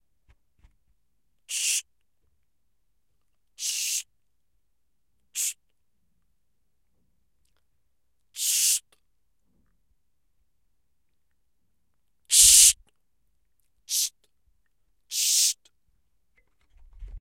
Asking for silence
Shhh, Silence